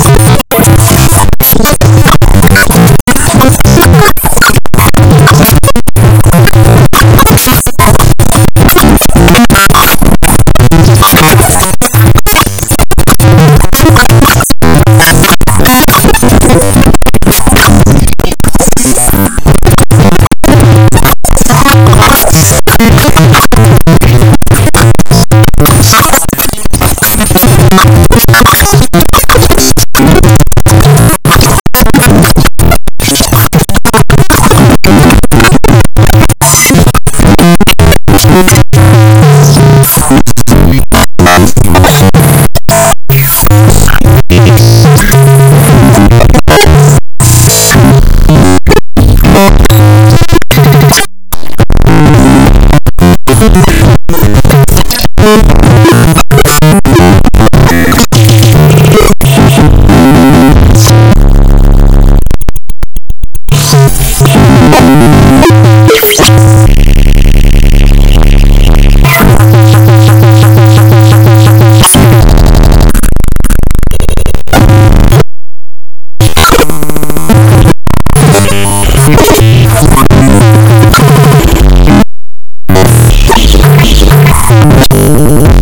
glitch farm 001
one sample run through lots of effects, but a large part of the sound is made by running the sample at really fast repetitions, so fast it just becomes a tone. That and making the sound repeat in fractions (?) or something like that. Like one trigger is 5 times and then the next is 3 times in the same space of time. etc
created by mangling a sample in Reaper's JS scripting language
digital, glitch, noise